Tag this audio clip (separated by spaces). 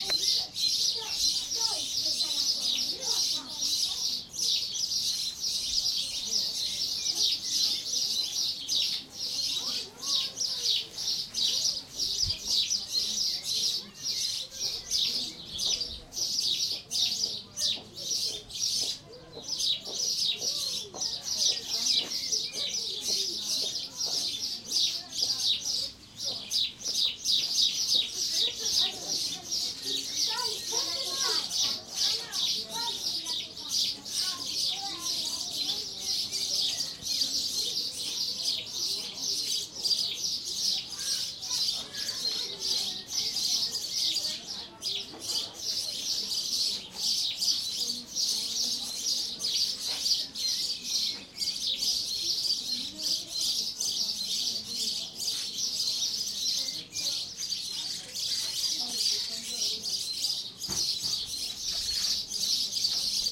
bird chirp field-recording sparrow